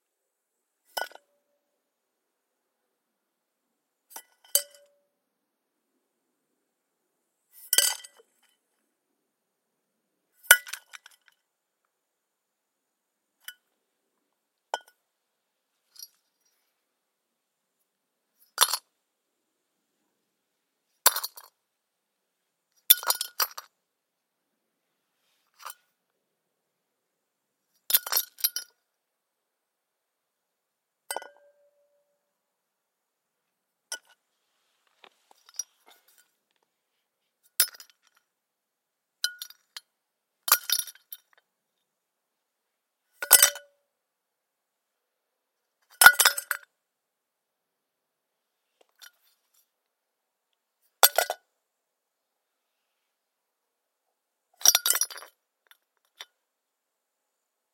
bruits.chute.petite.urne.gravillons
different falls of a piece of faïence on light stones